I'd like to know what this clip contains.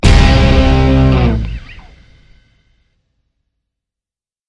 A solid guitar hit for splash screens etc.
It was recorded with my Fender Jaguar through an amp simulator on the computer. Added some cymbal sounds and a bass drum from my kit, and that's it.